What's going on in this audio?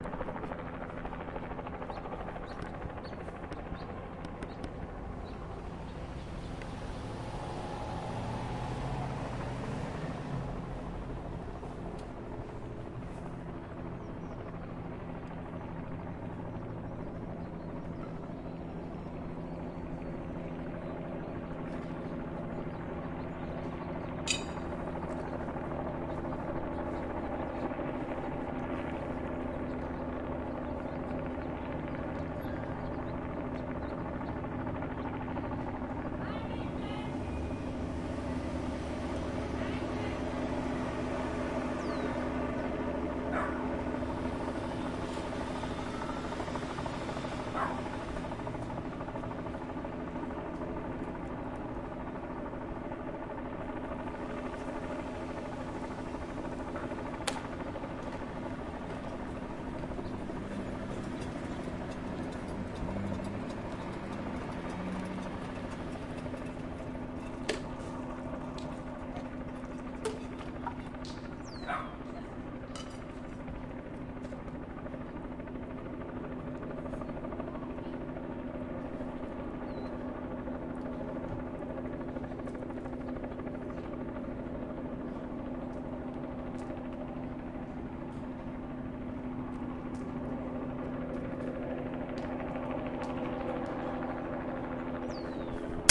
helicopter over neighborhood
This is a recording of a helicopter hovering over a quiet neighborhood in Hayward, CA. One can hear an occasional car passing, a dog bark, kids playing. Recorded on Zoom H4n.
helicopter, over-neighborhood, hover, cars-passing